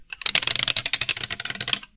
Tocando palos
tocando varios palos
palos, instrument